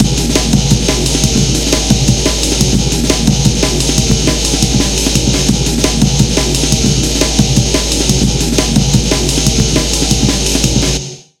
Nu Metal - Drum N Bass Loop
percussion-loop, metal, 175, Nu-metal, break, bpm, percs, drum-loop, DnB, breakbeat, groovy, Drum-n-Bass, loop, guitar, amen, loops